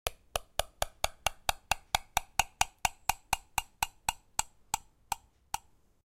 spoon in a cup
spoon makes sound in cup
Löffel macht geräusche im Becher
coffee, cup, spoon, tea, teacups